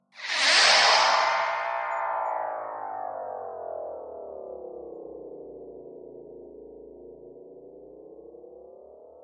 HITS & DRONES 29
Sound Fx created @ MarkatzSounds
great for broadcasting,commercials & such
Created on pro tools,nord lead2,various plugins
broadcasting, Fx, Sound